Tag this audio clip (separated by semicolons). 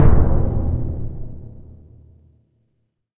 drum; epic; percussion; timpani